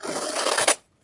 Wanted a transition to use in a slide show so recorded myself cutting paper with scissors